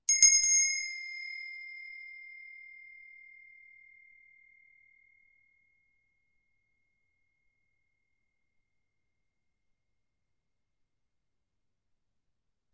brass bell 01 take7
This is the recording of a small brass bell.